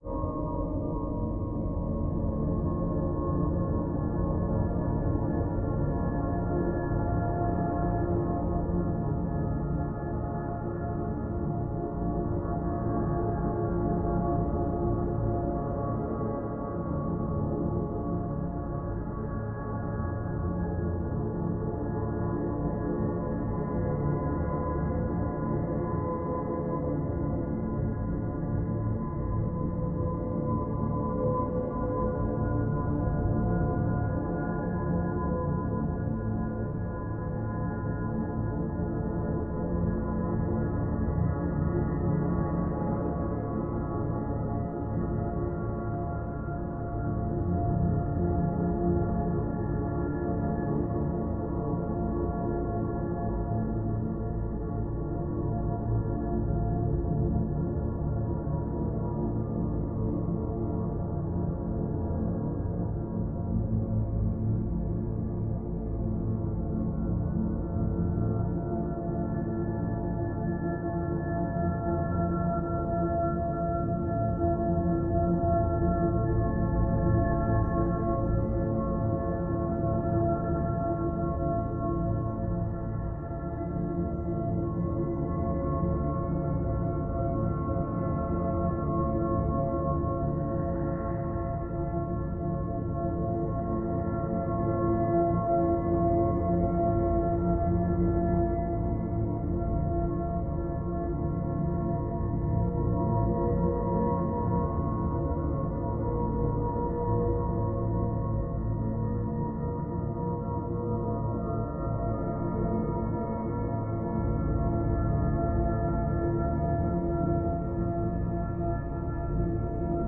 Two minutes long evolving drone with metalic timbre.
space, drone, evolving